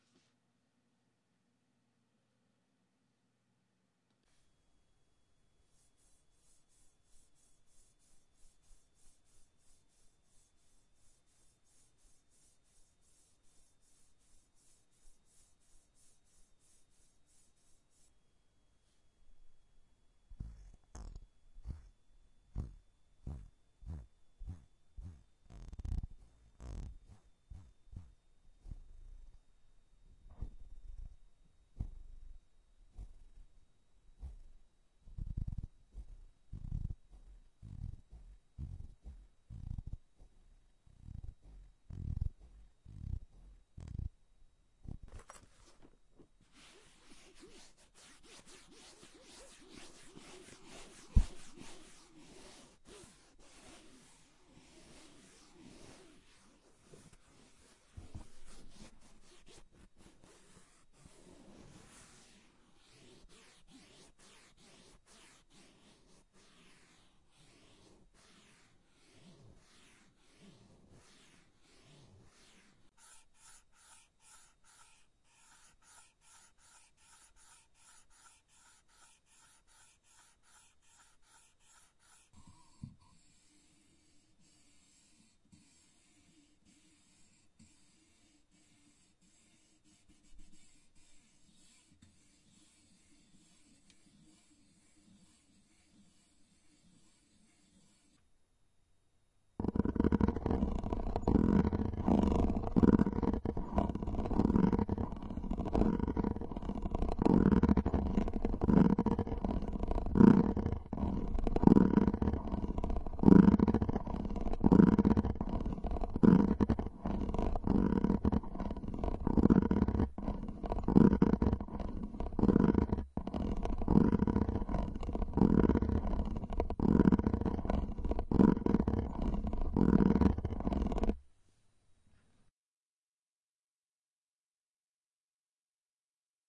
Finger rubbing on: Aluminum Ruler, PAR lens, Headphone case, smooth Altoid Mic pin box, window screen, microphone windscreen